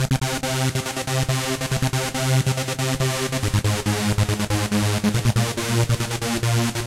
Destiny bass
Bassline for trance music. created with f.l. studio 6 and has high distortion on it.
progression, 140-bpm, beat, phase, synth, bassline, bass, drumloop, strings, distorted, sequence, trance, melody, techno, flange